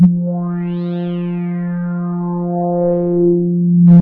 1 of 23 multisamples created with Subsynth. 2 full octaves of usable notes including sharps and flats. 1st note is C3 and last note is C5.